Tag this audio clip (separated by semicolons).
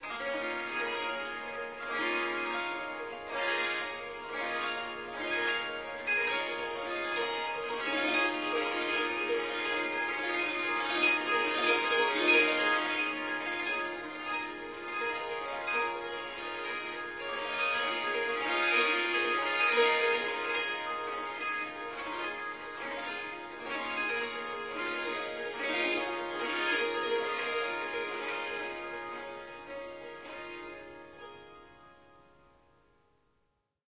Ethnic; Harp; Indian; Melodic; Melody; Riff; Strings; Surmandal; Swarmandal; Swar-sangam; Swarsangam